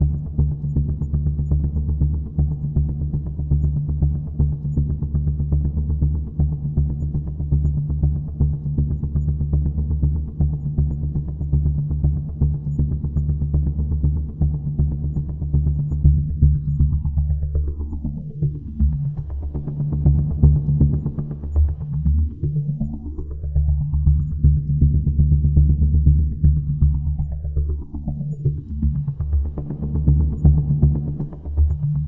Rhythmic Bass Pulses 8bars with delay & 8bars with delay & phaser
bass, electronic, rhythmic